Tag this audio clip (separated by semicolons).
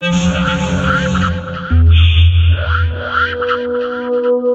Cold; Wondering; Important